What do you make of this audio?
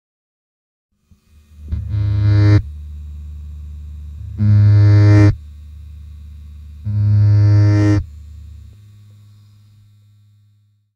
ELECArc-int 3ZAPS ASD lib-zoom-piezzo-stephan
buzz, distorted, techno, zap